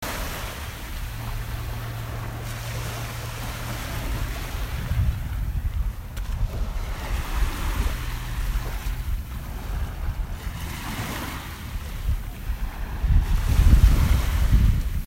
Beach Breeze And Waves 2
Recording of waves and gentle breeze at dusk on Alki Beach Park near Seattle, WA. Recording 2.
Gentle, Natural, Nature, Ocean, Sounds